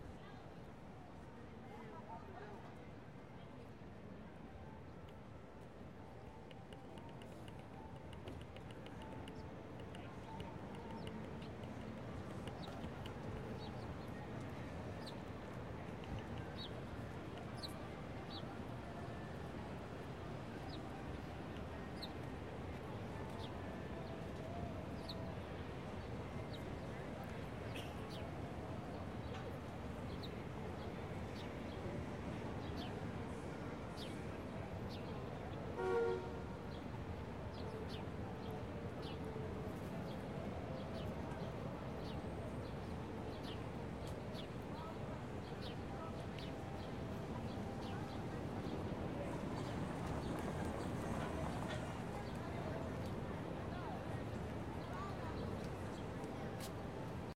City town square ambience.